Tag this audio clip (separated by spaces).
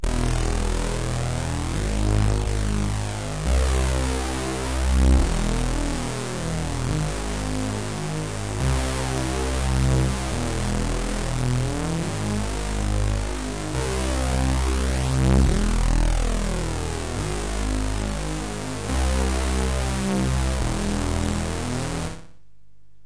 dark synth ambiene